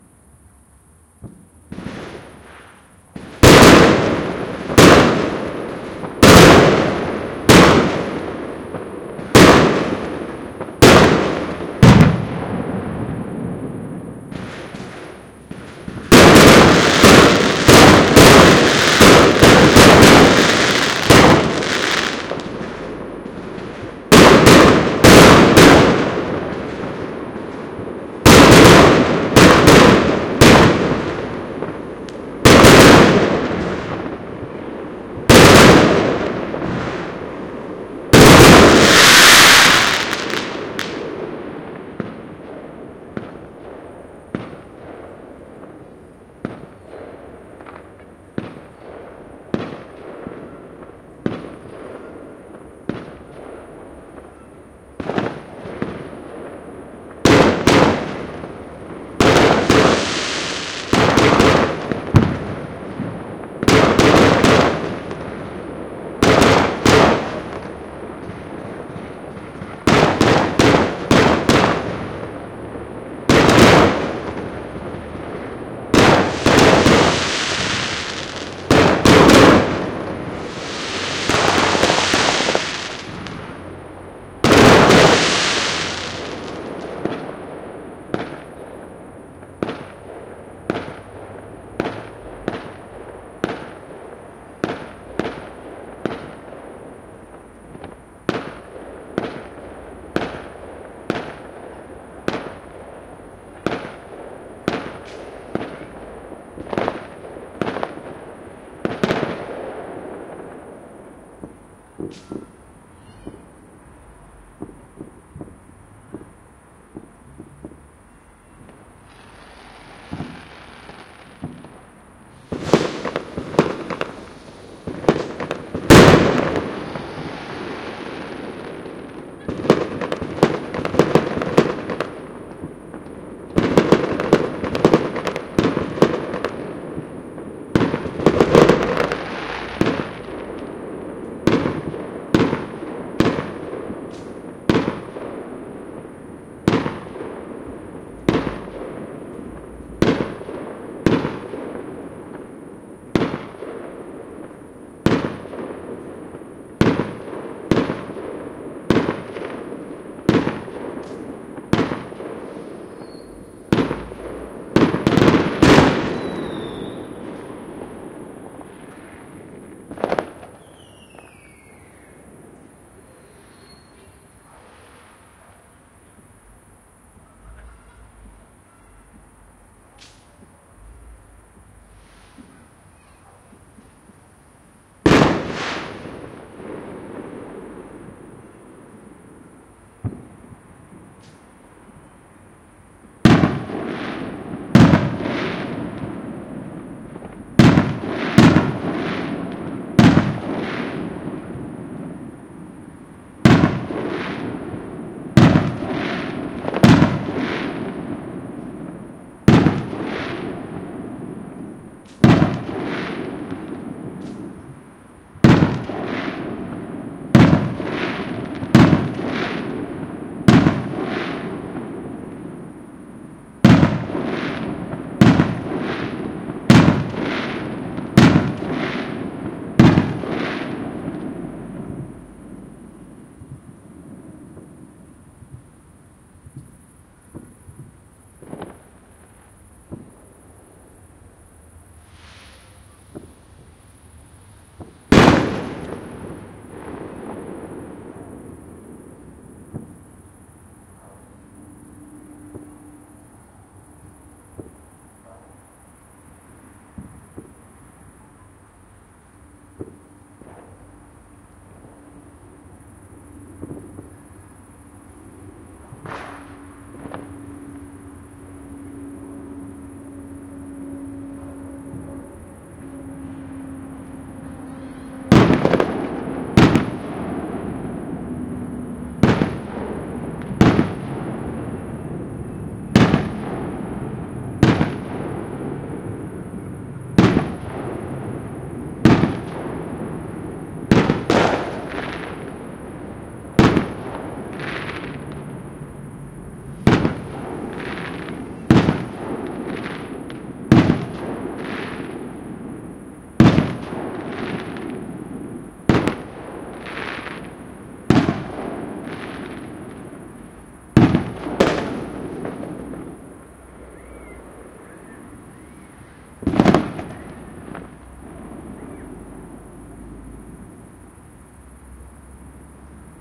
Due to the Corvid-19 pandemic all organised firework displays were cancelled to prevent large gatherings of people. Many private individuals held their own firework displays.
This recording was made with a DIY SASS microphone consisting of 2 x 4 EM-172 microphones.